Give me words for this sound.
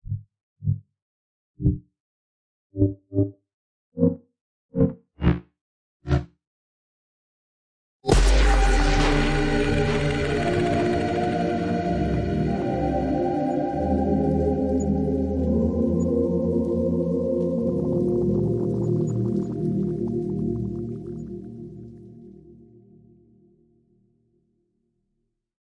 A synthesized chord progression bursts through a forcefield after several attempts to do so.
Created using sampling, field recording, modular synthesis, and granular synthesis.
buildup, composite, experimental, impact, melodic, soundscape, synth